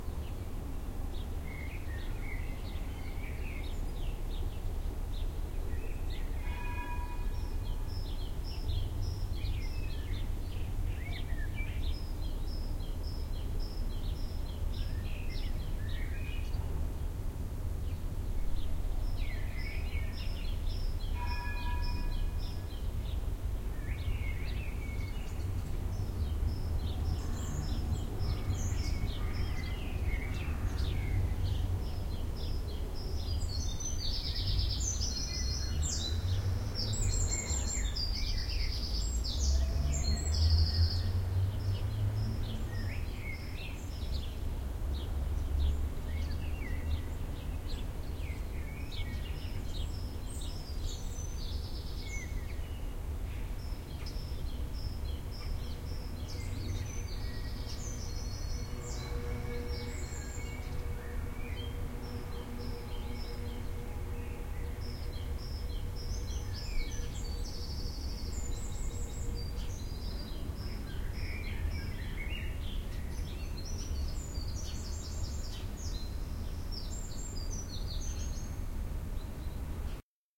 Around six in the morning of the twentysecond of may 2007 the first streetcar of that day is leaving. Accompanied by blackbirds, sparrows, a northern wren and other birds ass well as a scooter, a car and other urban things that make noise during the early morning. Recorded with an Edirol R09
traffic, street, field-recording, street-noise, nature